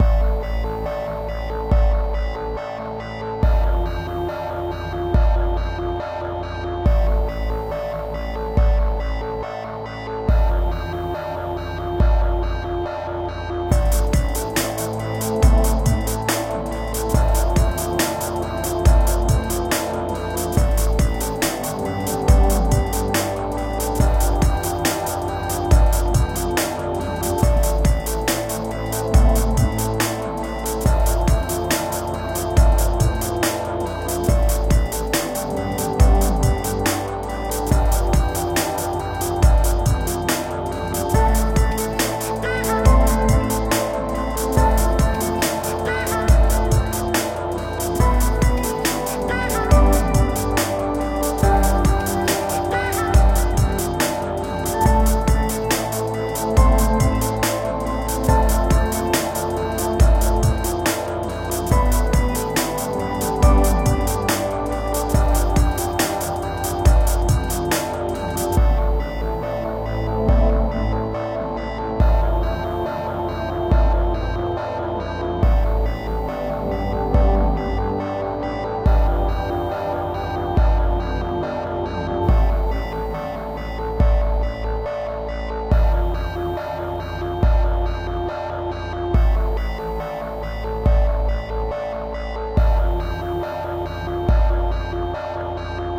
Newtime - electronic music track .
ambience, ambient, atmosphere, bass, beat, dance, effect, electro, electronic, loop, loopmusic, music, noise, processed, rhythmic, sound, synth, track, trip-hop, voice